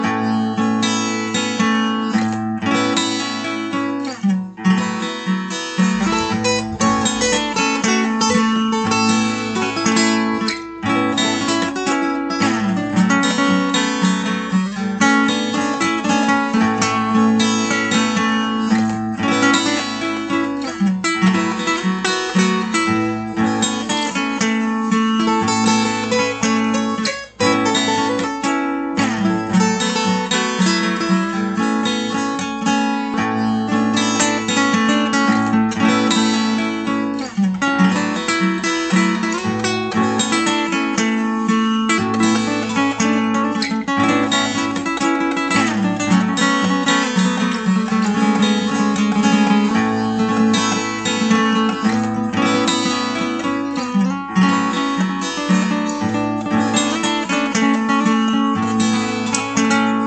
An Original Composition for a Collab with Dan Lucaz the winner of my Audacity challenge. Key Of A.